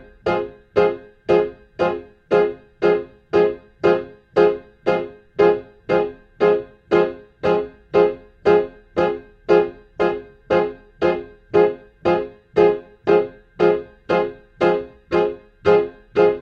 BC 117 Fm PIANO 1
Roots, HiM, Rasta, onedrop, DuB, Jungle, Reggae